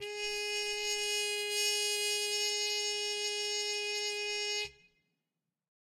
One-shot from Versilian Studios Chamber Orchestra 2: Community Edition sampling project.
Instrument family: Brass
Instrument: Trumpet
Articulation: harmon mute sustain
Note: G4
Midi note: 68
Midi velocity (center): 95
Room type: Large Auditorium
Microphone: 2x Rode NT1-A spaced pair, mixed close mics
Performer: Sam Hebert
brass, g4, harmon-mute-sustain, midi-note-68, midi-velocity-95, multisample, single-note, trumpet, vsco-2